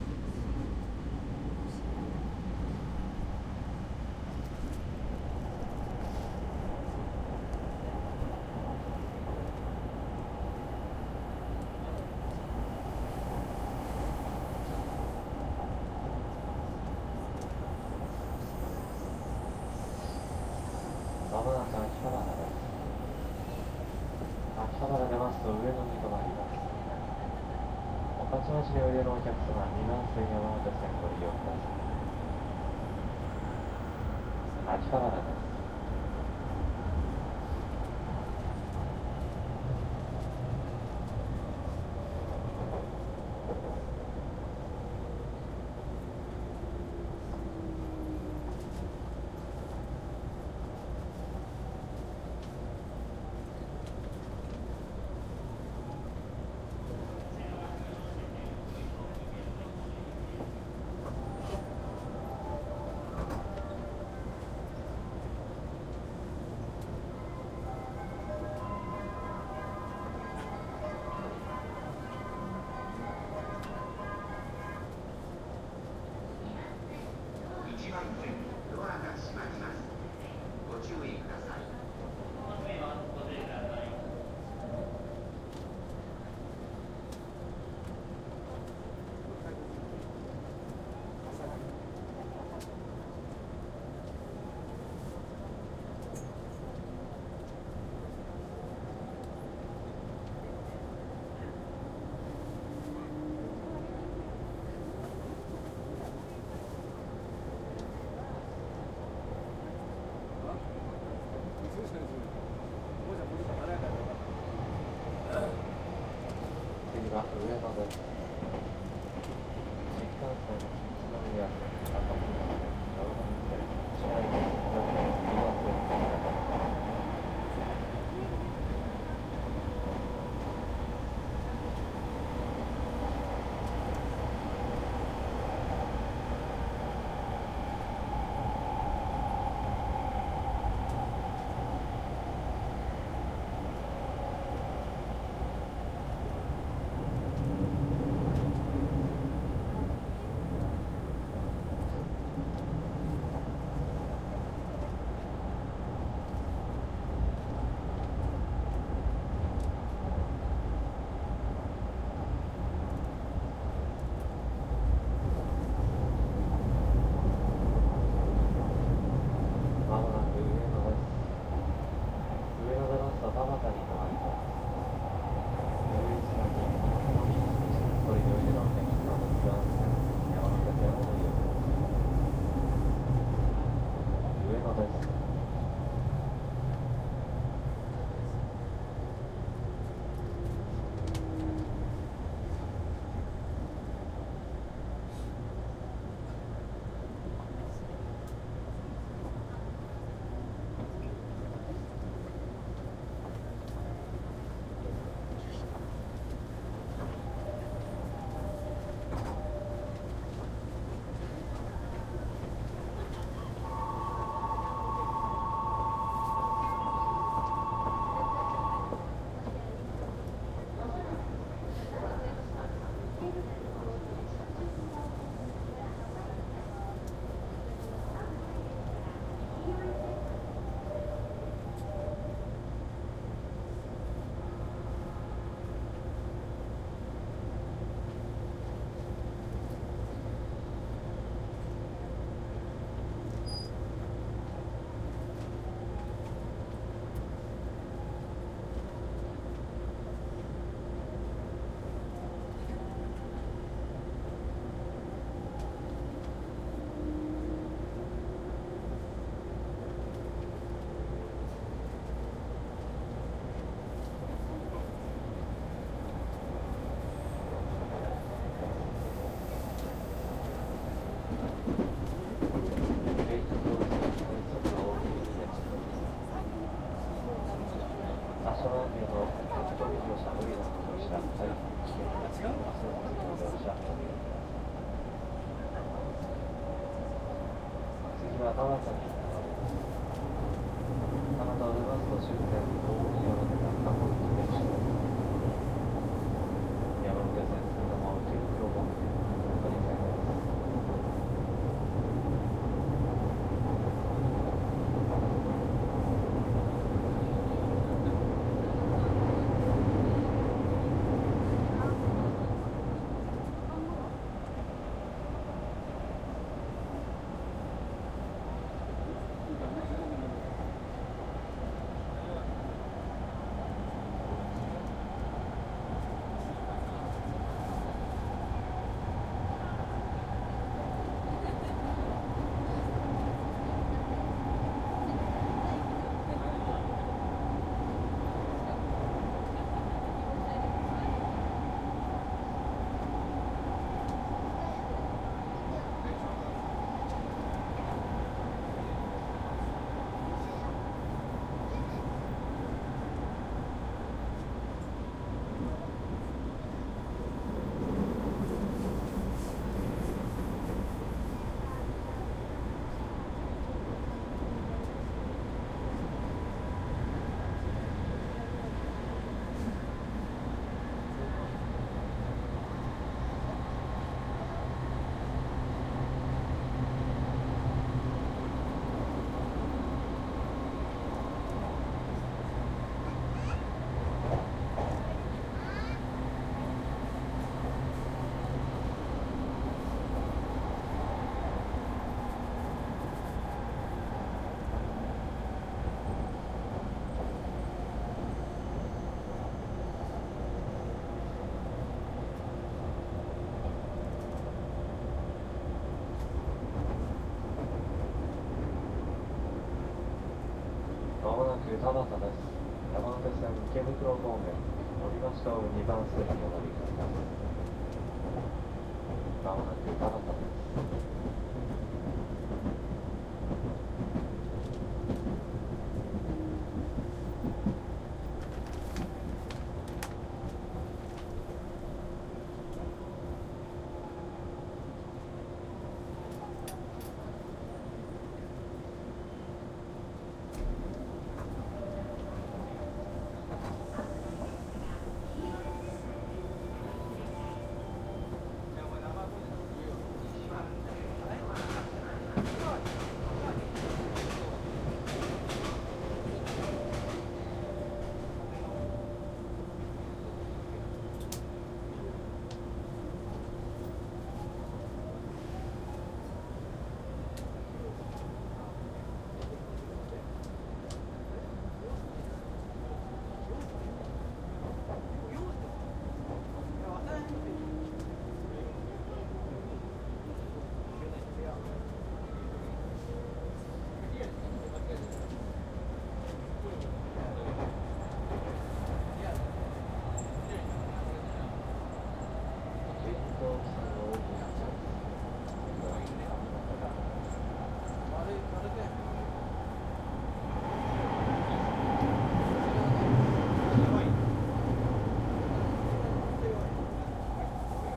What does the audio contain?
Inside the Kehin-Tohoku Line Train bound for Omiya. The train passes through 2 or 3 stations. You can hear the train, doors, crowd, station sounds and announcements. Made with 2 microphones placed at 120 degree angle. HPF cuts off at about 20Hz.
kehin-tohoku, subway, ambience, background, train